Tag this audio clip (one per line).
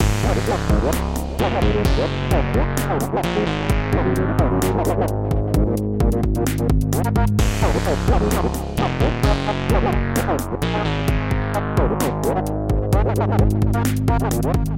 130bpm
Db
loop
major
music
reasonCompact